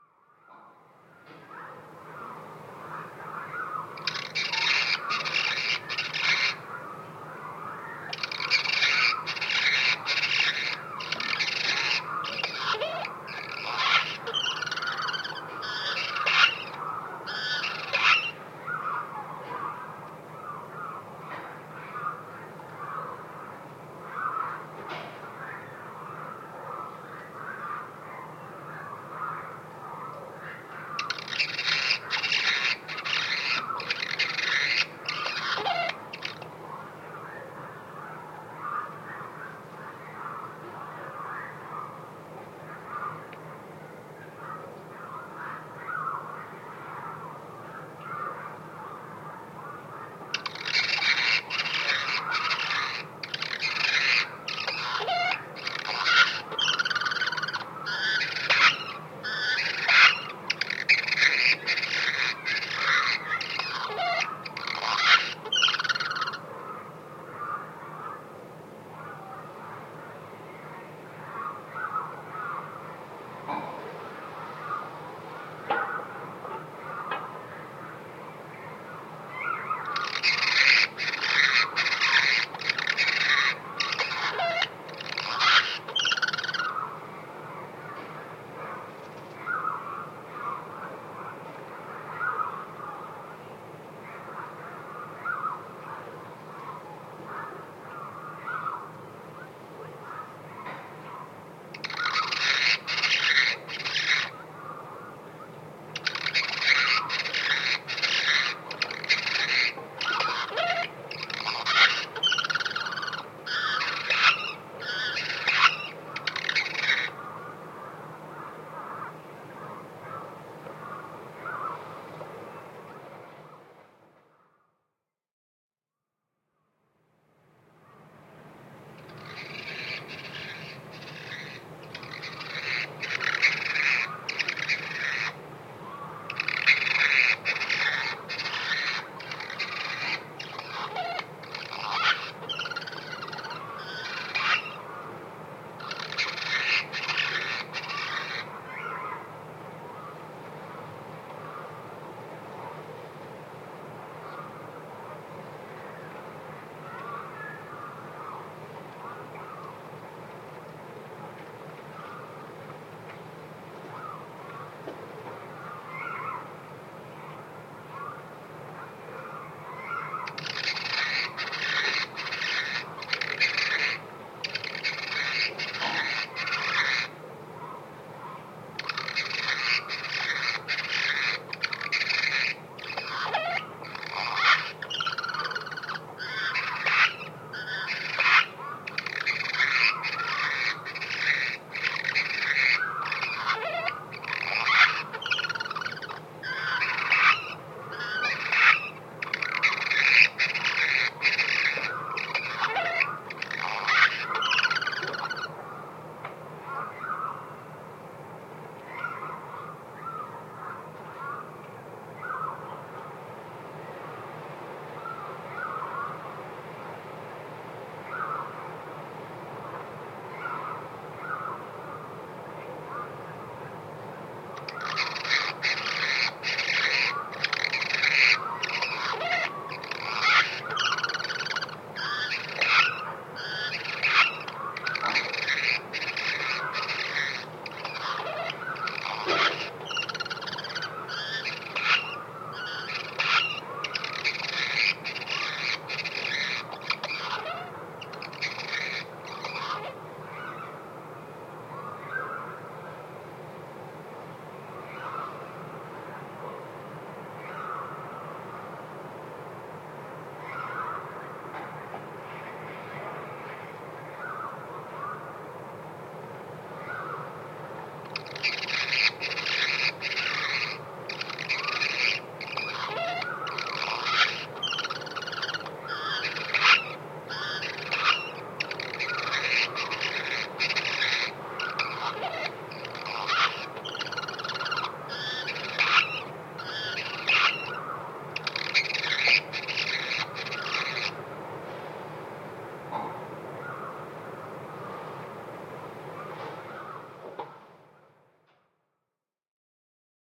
ag05aug2012 bpd1k 1third speed
Annas-Hummingbird, avian-acoustics, field-recording